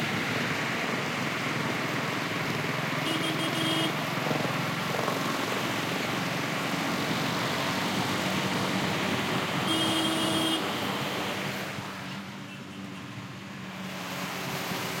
1.MUMBAI TRAFFIC
Hi Everyone,
Well this sound has been recorded via Roland R26 field recorder on the street of Mumbai city.
It's a stereo file recording.
Hope this is helpful to you guys in designing your sound.
cars, roads, noise, traffic, city, mumbai, field-recording, india, ambience, street